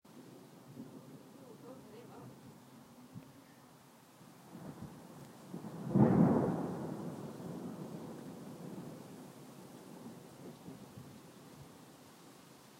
Thunder Clap Single
thunder, a single rumble
ambient, field-recording, lightning, nature, rain, raining, rainstorm, rumble, storm, thunder, thunder-storm, thunderstorm, weather, wind